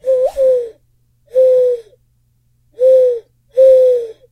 Man Doing Dove Call
A man doing a dove call with his hands.
birds, call, dove, bird